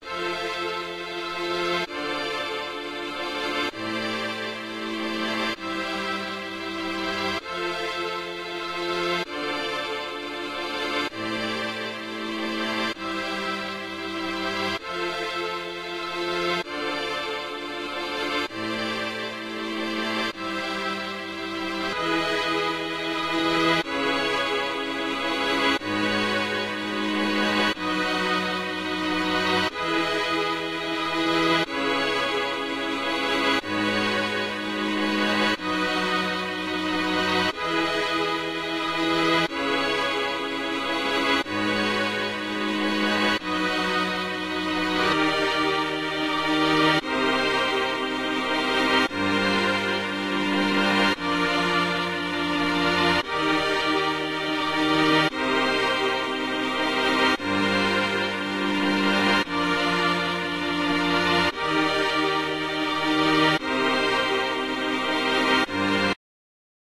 Sad Theme
Simple thing i made in FL studio, i'm a beginner.. would be lovely to get some tips :)
Sad, ending, Slow